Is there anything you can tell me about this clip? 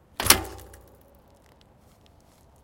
bicycle hitting ground after a jump